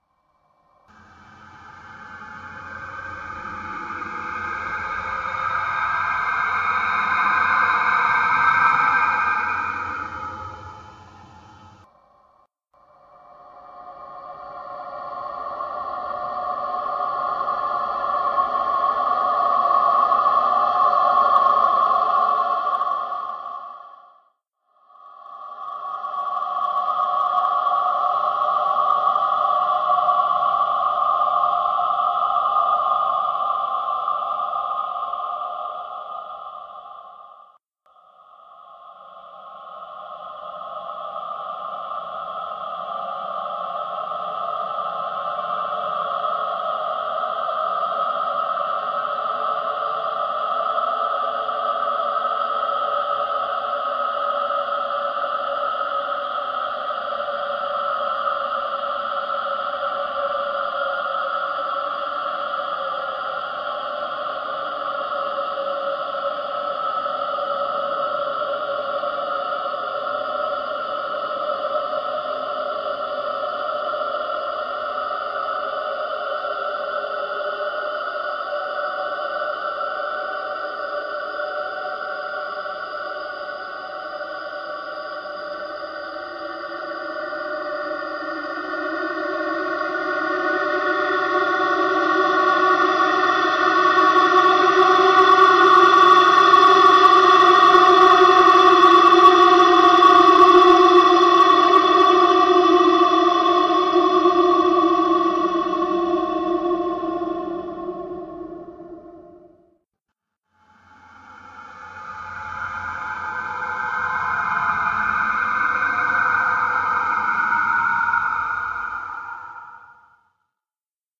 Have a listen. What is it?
Creepy atmosphere that builds both suspense and drama.
Another great sound to pair with would be this awesome creation by brian1967